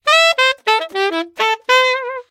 I recorded this lick on my 1923 Conn Alto Sax. It should be good for Key of Am for guitarist playing along. Tempo was 105 bpm.
am, alto, sax, concert, 105bpm